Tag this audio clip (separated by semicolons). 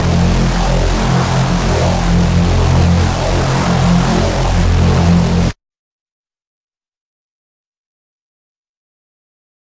distorted; hard; processed; reese